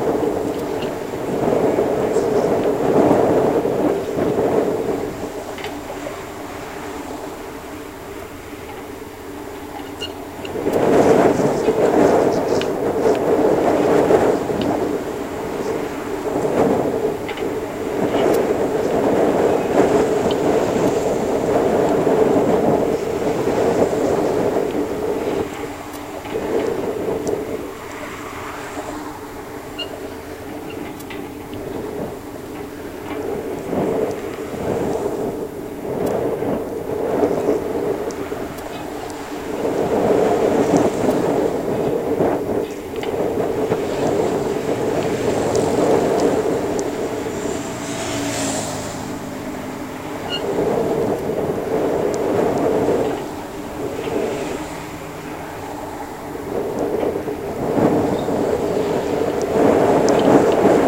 Contact mic recording of the Golden Gate Bridge in San Francisco, CA, USA at the center of the span, main cable above suspender #63 (Take 02). Recorded October 18, 2009 using a Sony PCM-D50 recorder with Schertler DYN-E-SET wired mic. Note the radio(?) squeaks probably from the weather telemetry gear installed on the bridge.